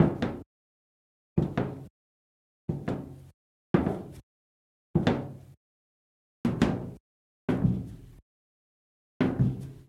Footsteps on Metal Platform Wearing Brogues 2
Recording of me walking on the bottom of a metal wheelbarrow whilst wearing brogues.
Low frequency rumbly thumping against metal, with mid frequency slap of shoe.
Recorded with a Zoom H4N Pro field recorder.
Corrective Eq performed.
This could be used for the action the sound suggests, or for someone walking over a metal walkway.